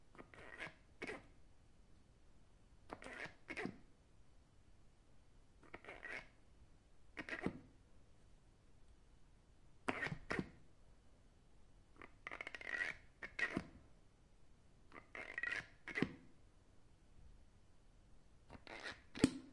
I press on the soap dispenser's head again and again. It makes a spring-squishing sound. Recorded with a Zoom H2.
Soap, Dispenser, press, spring, Soap-Dispenser, squish, pressing, squishing, mechanism